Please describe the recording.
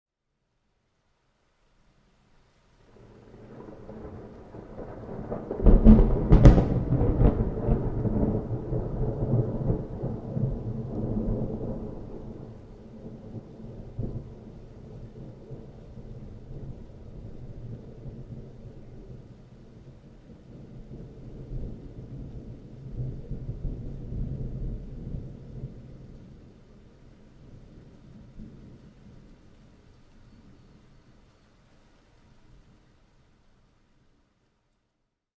A distant, but loud lightning strike.
Recorded with a Zoom H1.